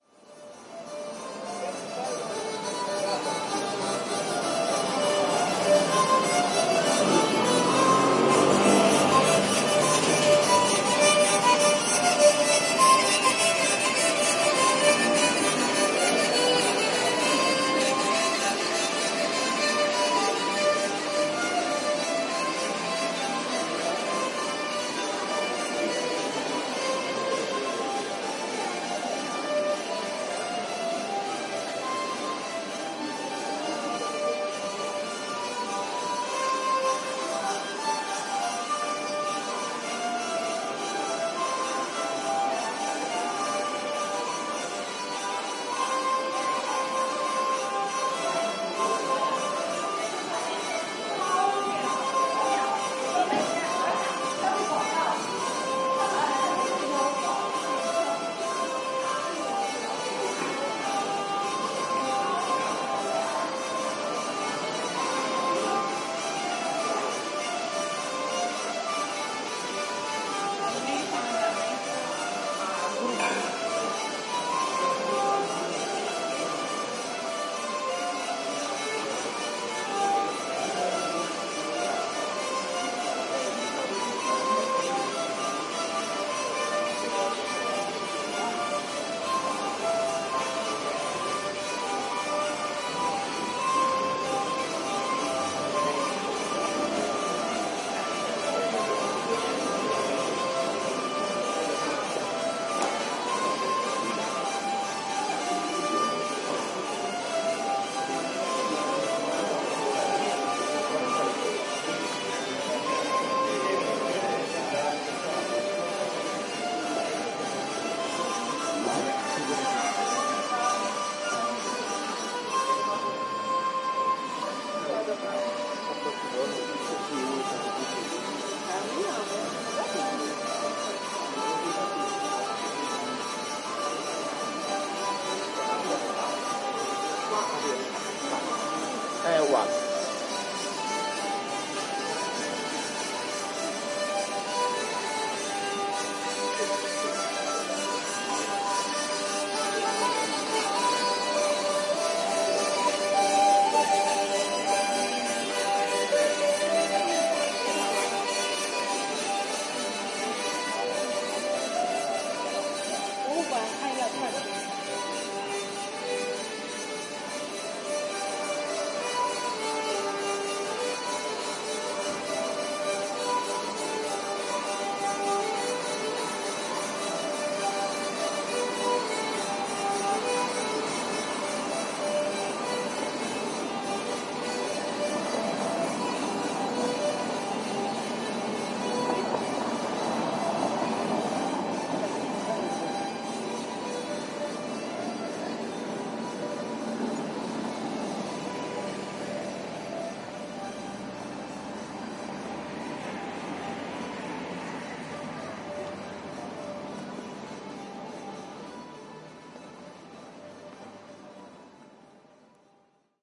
20160816 street.hurdy-gurdy.02
Recorded near Piazza Carignano, Torino (Piamonte, N Italy) using PCM-M10 recorder with internal mics.